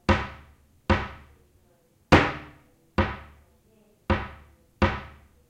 20070128.toc.toc
bangs produced by a wooden cabinet door
bang, door, percussion